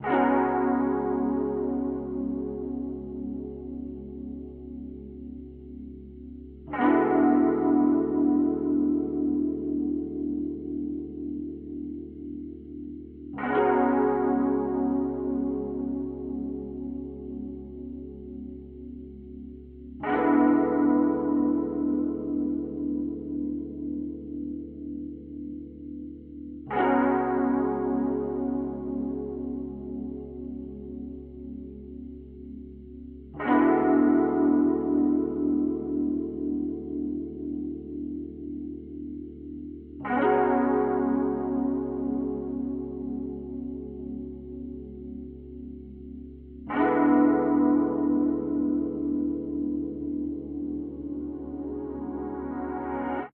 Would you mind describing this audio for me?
DSV1 SuspensfulRhodes07 C# 72bpm
Much love and hope the community can use these samples to their advantage.
~Dream.
Horror, Piano, Reverb, Suspense, Ambient, Chorus, RnB, FX, Blues, Rhodes